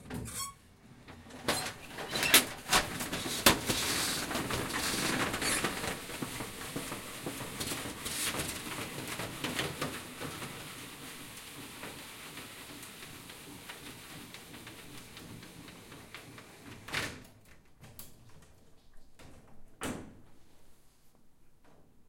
Queneau Ouverture Rideau 02
ouverture et fermeture d'un store à manivelle
manivelle, mechanical, open, player